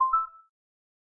Beep 04 Positive 2
a user interface sound for a game
beep, tone, user-interface